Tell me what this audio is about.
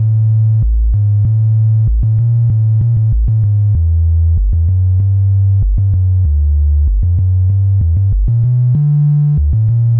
Made with FruityLoops. Low frequency, not suitable for cheap speakers.